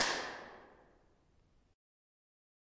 Capricorn IR #2
A couple of Impulse Responses from the Capricorn Caves in Central Queensland, Australia, nice for anything
Australian-Caves, Cave-Acoustic, Cave-Impulse-Response